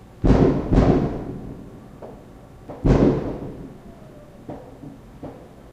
distant firework boom 4
This last one was (obviously) recorded on the 4th of July.
4-july, 4th-of-july, bang, battle, bomb, boom, bullet, explosion, fire-crackers, firecrackers, firework, fire-works, fireworks, fourth-of-july, gun, gunshot, july, july-4, loud-bang, loud-boom, missile, rocket, rockets, war